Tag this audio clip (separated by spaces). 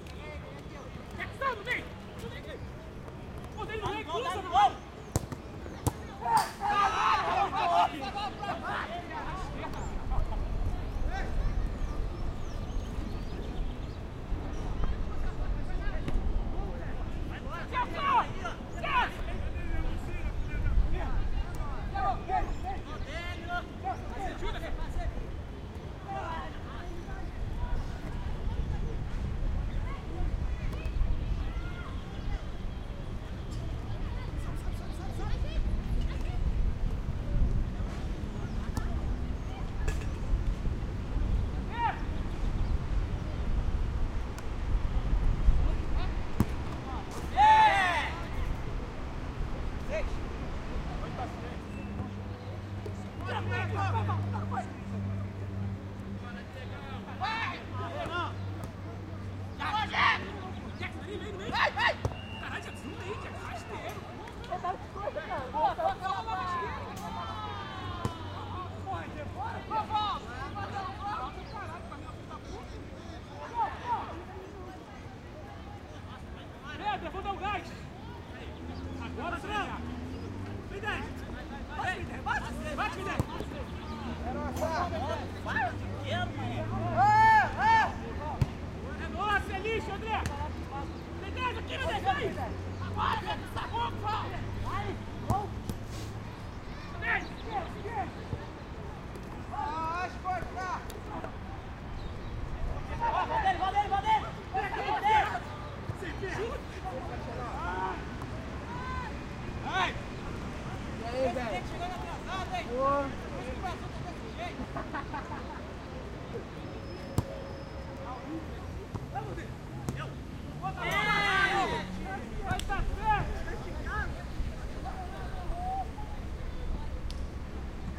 ball football soccer sport